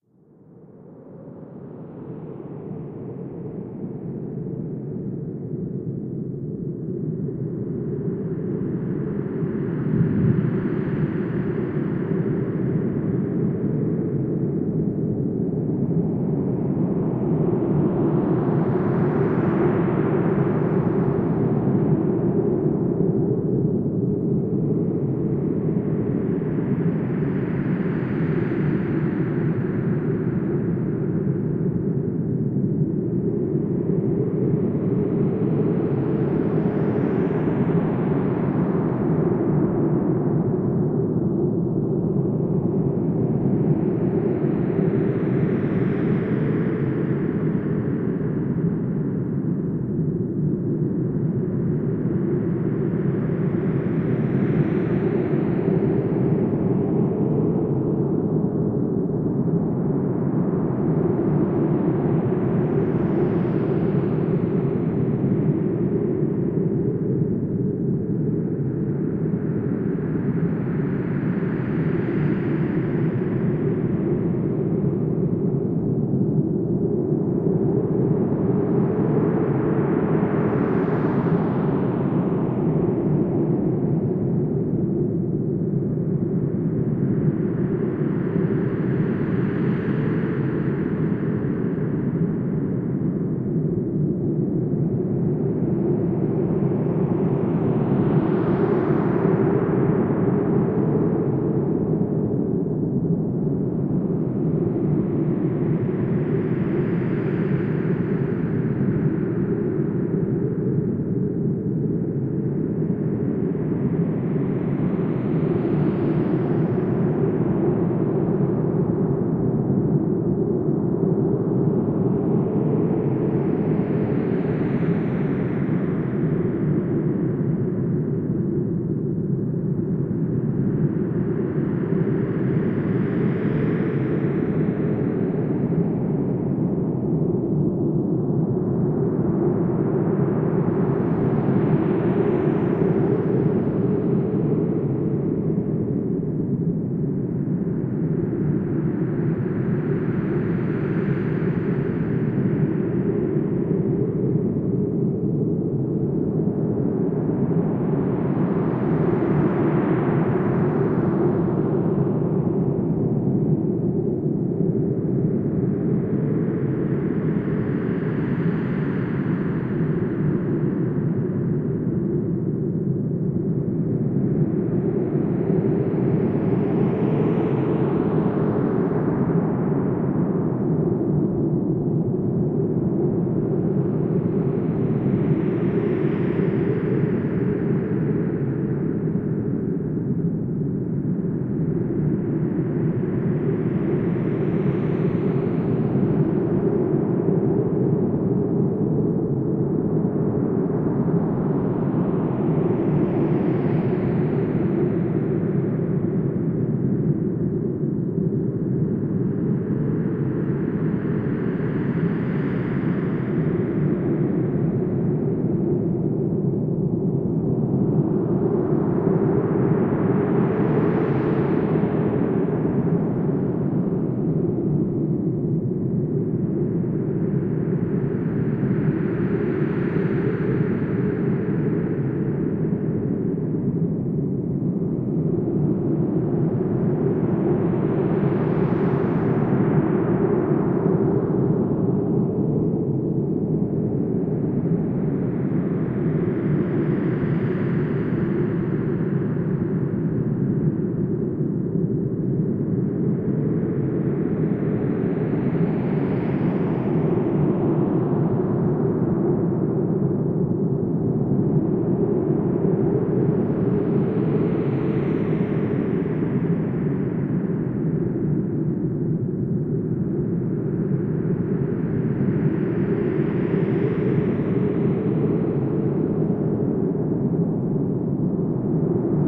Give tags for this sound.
ambience
atmosphere

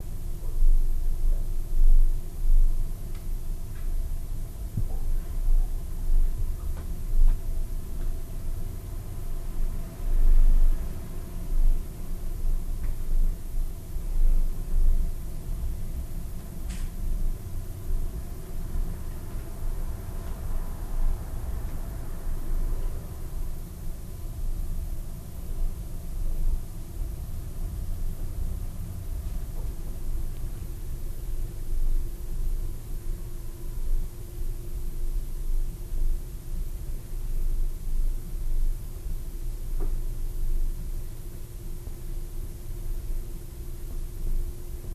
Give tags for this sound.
living
medium

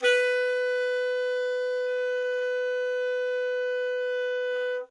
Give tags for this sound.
alto-sax
instrument
jazz
music
sampled-instruments
sax
saxophone
woodwind

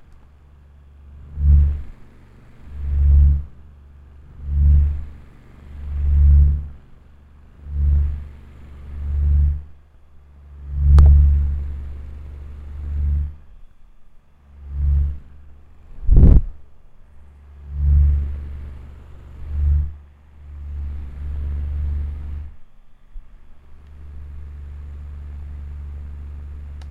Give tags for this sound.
H4N
Rumble
Zoom
Motor
Right
Fan
Channels
Woosh
Left
Proximity

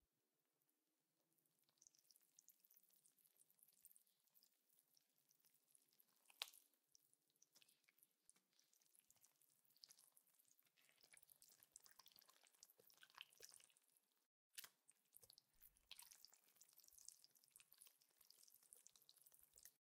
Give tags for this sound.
batter
gloop
gooey
gross
hand
mix
mixing
moist
OWI
squelch
squish
wet
whisk

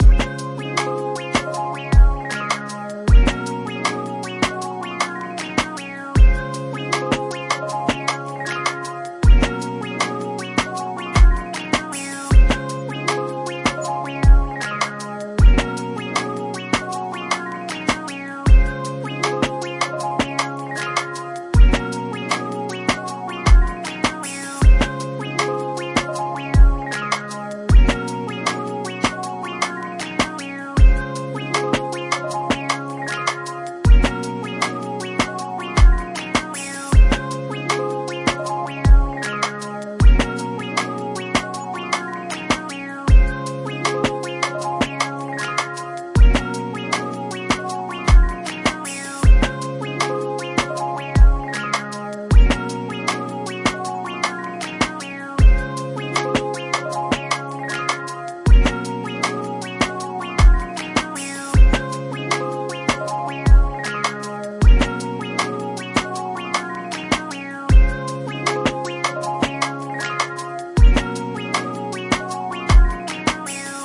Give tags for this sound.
Background
Cat
Commons
Creative
Electronic
Fun
Happy
Instrumental
Lo-fi
media
Modern
music
Playful
Social
sounds
Streaming
Synth
Upbeat

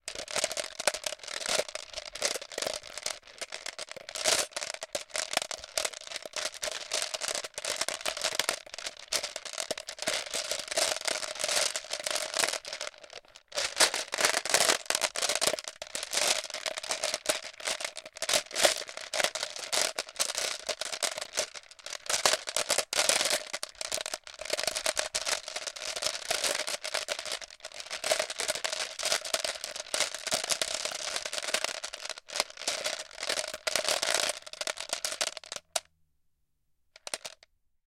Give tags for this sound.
click
effect
glass
high
marbles
moving
percussion
pitch
plastic
shake
shaking
toy

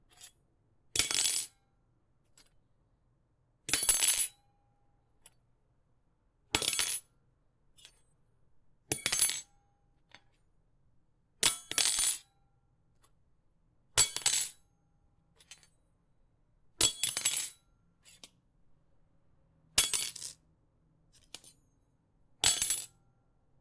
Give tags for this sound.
drop
floor
fork
metal

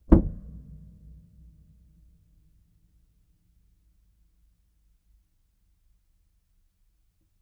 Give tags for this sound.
pianino
untuned
noise
raw
out-of-tune
sample
character
pack
upright-piano
recording
dirty
noisy
un-tuned
acoustic
sample-pack

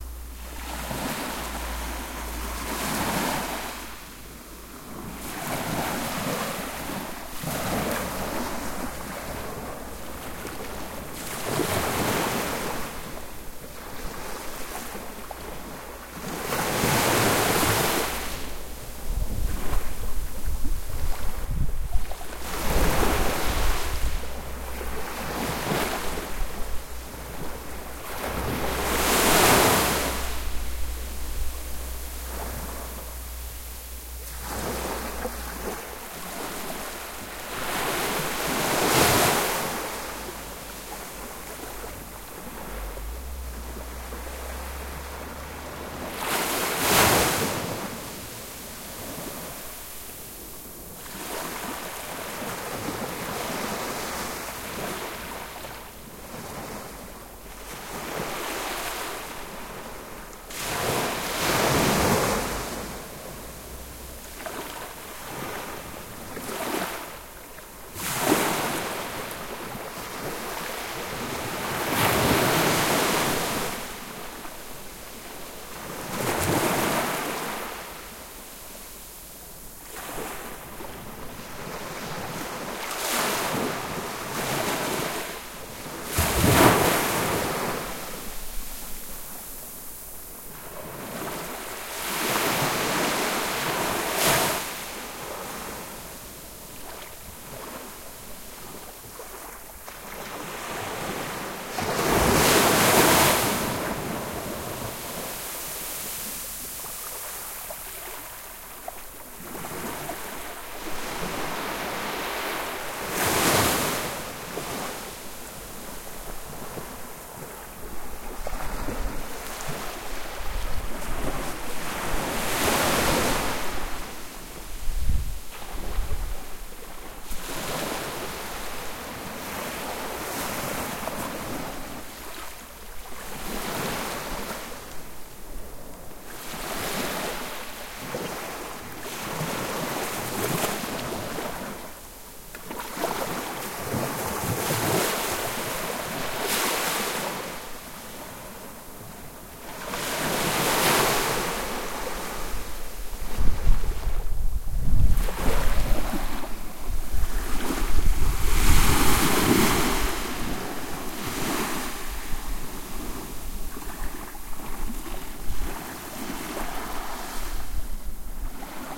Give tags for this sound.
beach
breaking-waves
coast
field-recording
lapping
ocean
sand
sea
seaside
shore
surf
water
wave
waves

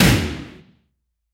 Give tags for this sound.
Hollywood
blockbuster
taiko
drum
trailer
cinematic

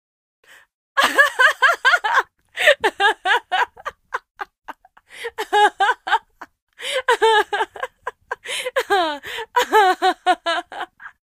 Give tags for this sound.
acting
getting-tickled
happy
hilarious
human
humor
Laughing
tickle
tickling
vocal
voice

